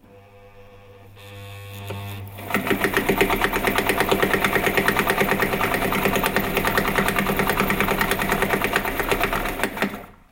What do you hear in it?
Electrical sewing machine branded "Victoria". You can hear the overload of the electrical motor driving the
machine as the pedal is pushed before start.
Recorded through the internal stereo mike of a Sony EX1 camera.
field-recording, machine, noise, stereo